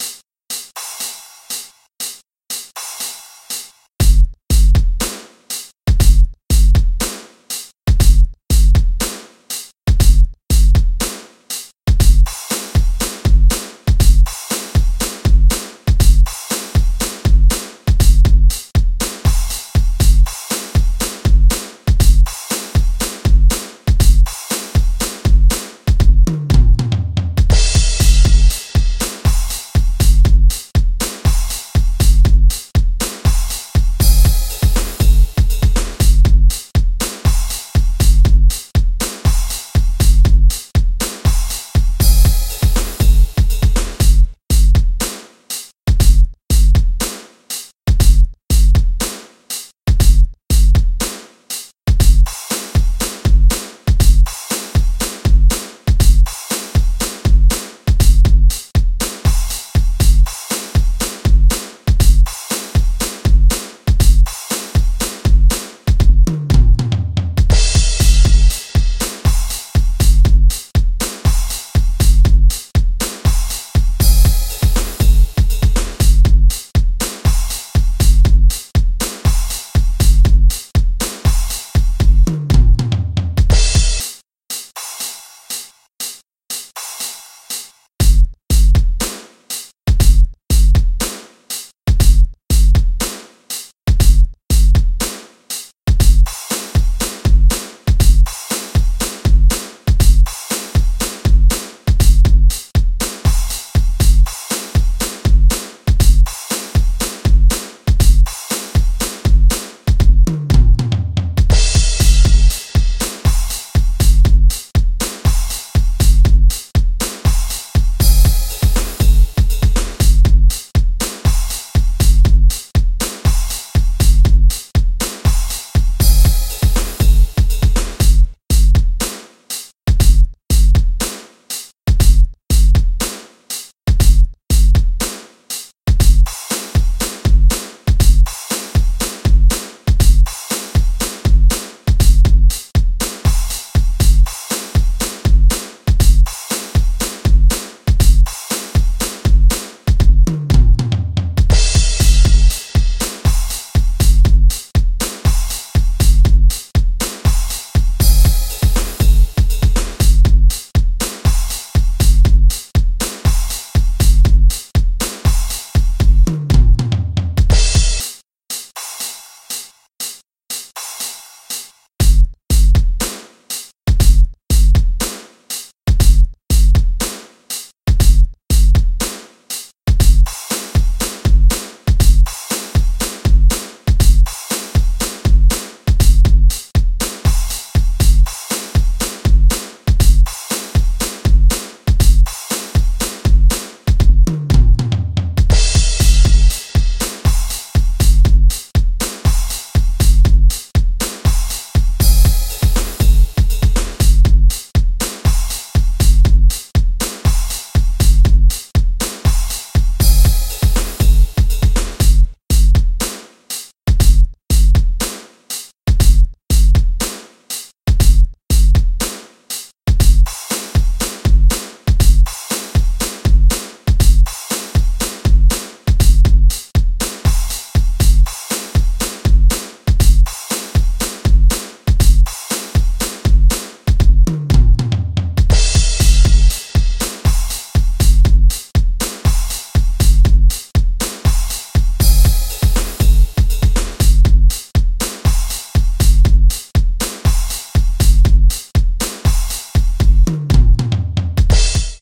I created these perfect Drum Beat/loops using my Yamaha PSR463 Synthesizer, my ZoomR8 portable Studio, Hydrogen, Electric Drums and Audacity.
trap, rhythm, rap, techno, hip, Drum, dub, house, club, music, rock, beat, jazz, edm, loop, hop, step, bpm, hydrogen